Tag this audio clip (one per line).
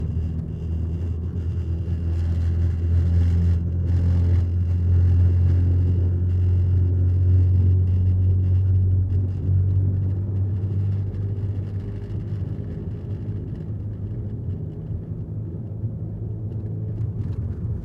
car-crassing-metal-bridge
space-ship
taking-off